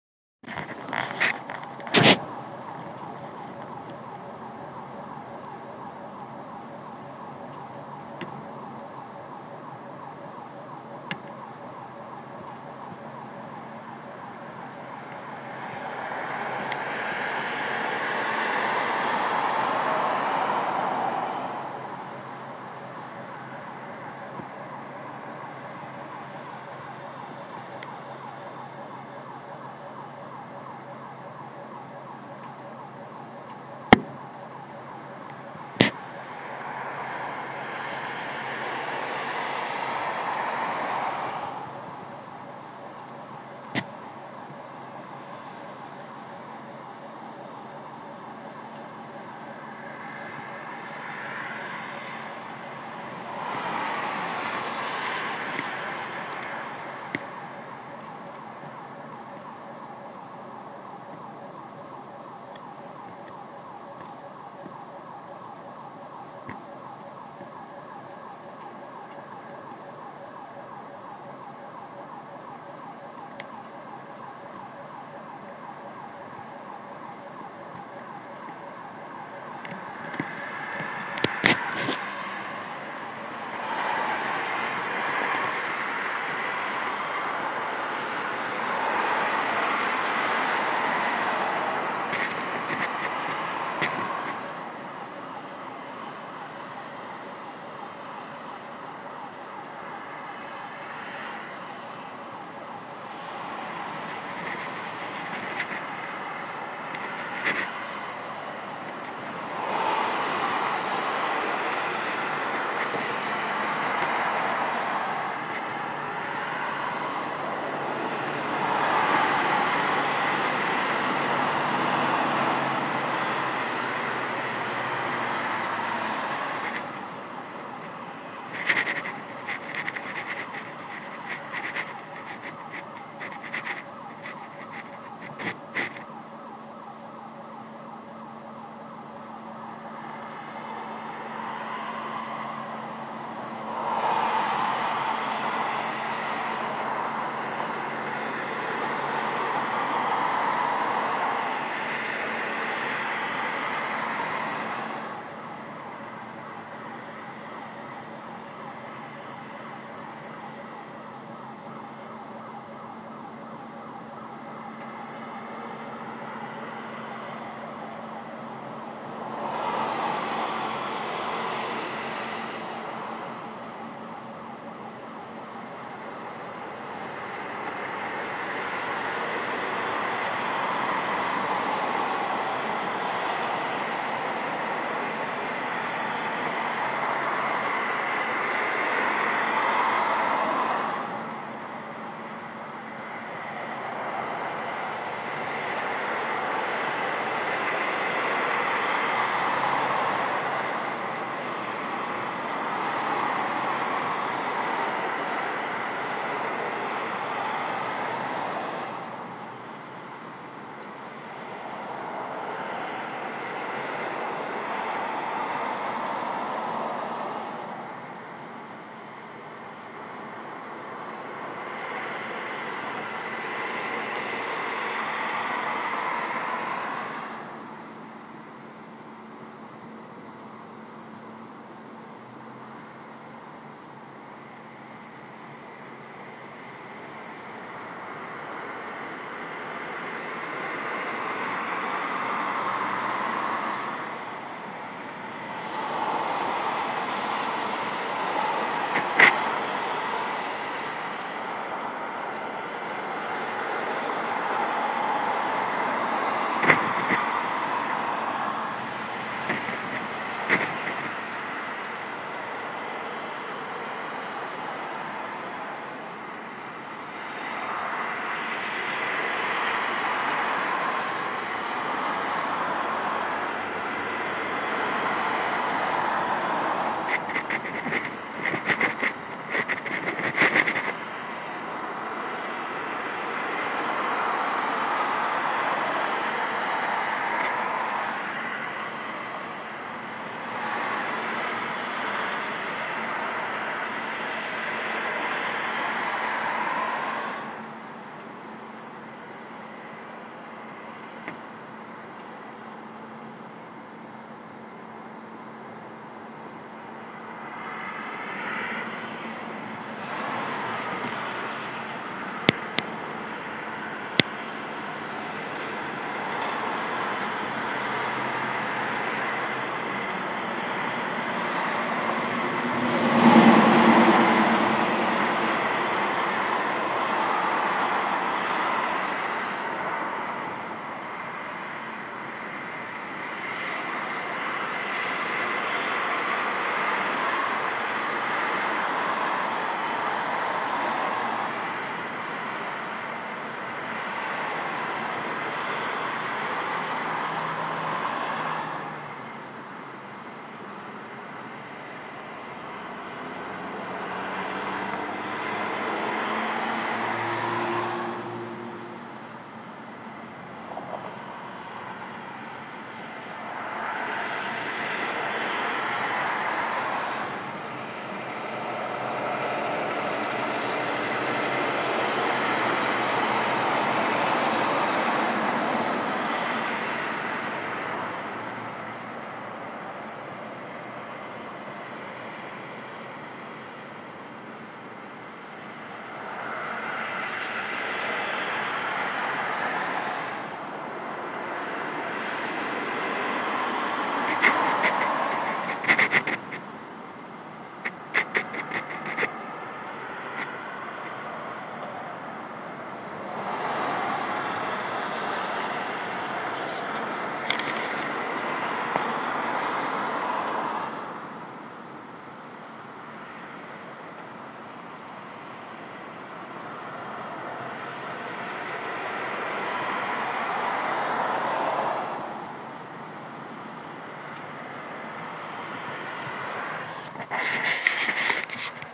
Distant Security Alarm Siren With Traffic
Here it is. I was in my sisters house at night and heard this. Her house is near a road with lots of traffic. So i thought this would be a good recording. The alarm stops at around the 3 and a half minute mark but I kept recording the traffic until the end of the recording. Please enjoy this :-)